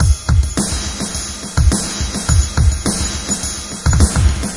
Drums im still workin on getting better at making drum beats.
sequence, bassline, beat, drumloop, 105-bpm, progression, drum